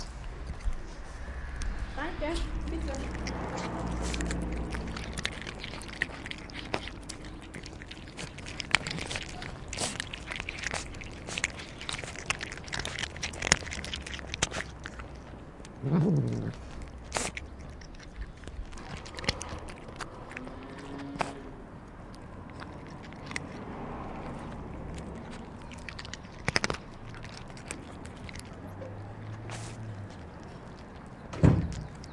cats feeding
cats gnawing away on dry food, the stronger gets more.